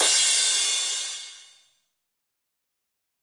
some crash cym